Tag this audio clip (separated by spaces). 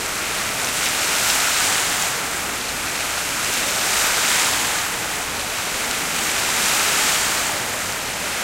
field-recording stereo kuala fountain malaysia klcc lumpur